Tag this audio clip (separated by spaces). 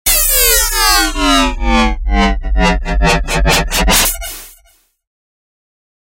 120-bpm 2-bars DX-100 FM-synthesis Harsh industrial